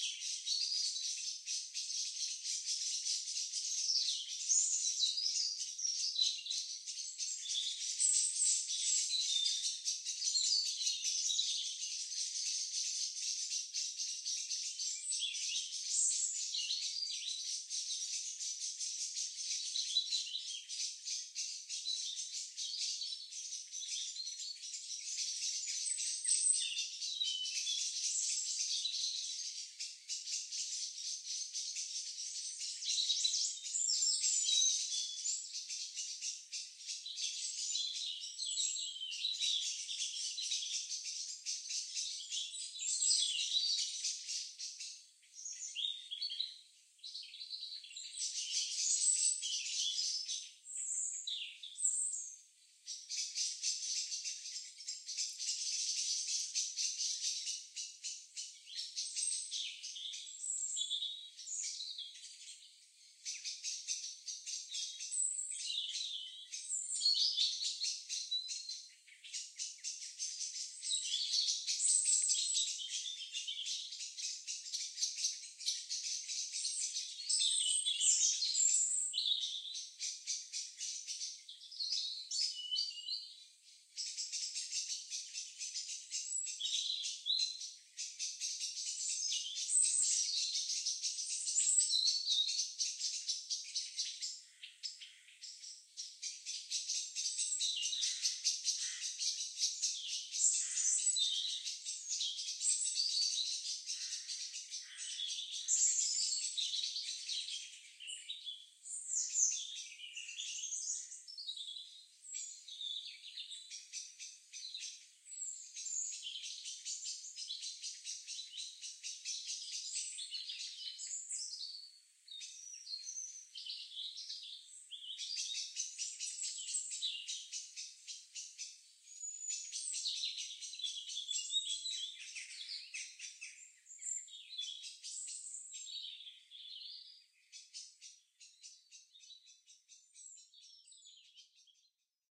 Birds In The Tree's
Bird sounds in the trees above